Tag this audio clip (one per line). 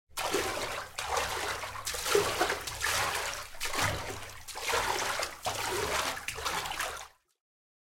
CZ
Czech
Hands
Pansk
Panska
Slow
Sport
Swimming